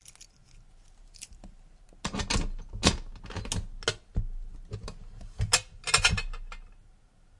Opening a small metal box with keys.